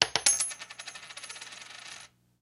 Silver Quarter 2
Dropping a silver quarter on a desk.
Coin, Currency, Desk, Drop, Money, Quarter, Short, Silver